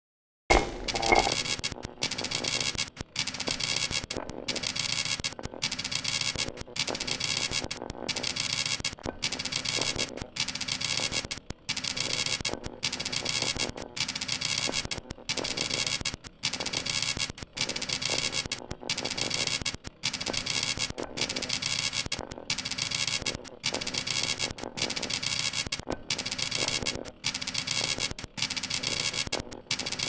biketire design 06
one of the designs made from a source recording of objects being pressed against a spinning bike tire.
Check out the rest of the pack for other sounds made from the bike tire source recording